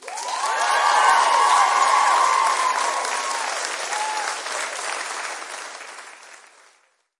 Small audience clapping during amateur production.